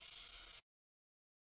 Pinzas de robot